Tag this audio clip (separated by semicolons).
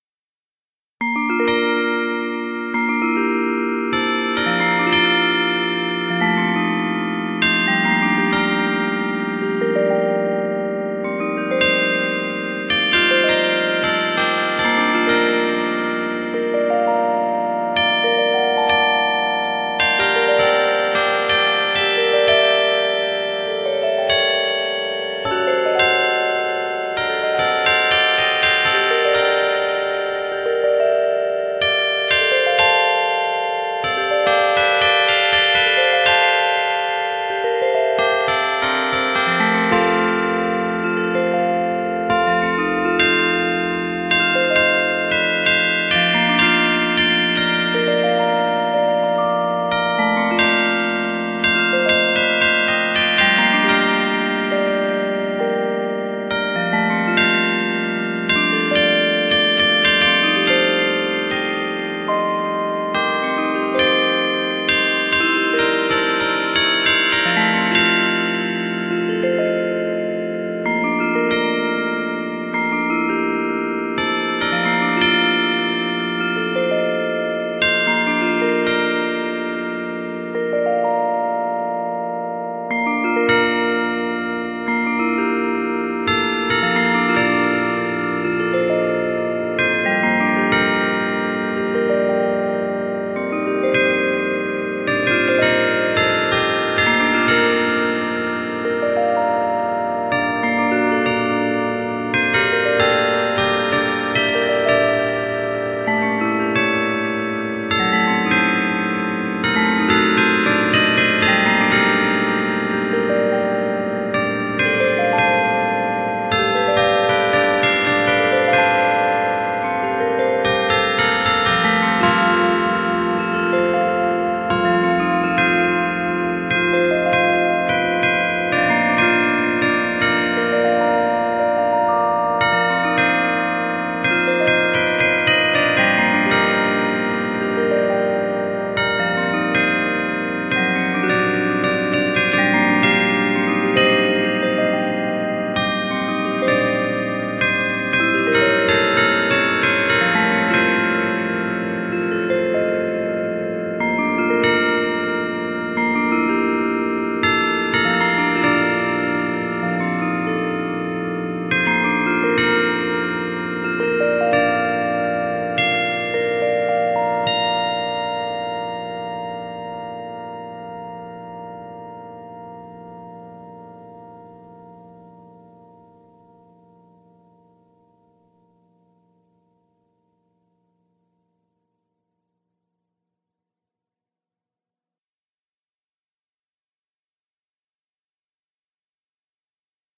Carillon-Chimes; Church-Bells; Songs